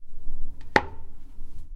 Yes. I peed and recorded it. Clean delivery and nice depth.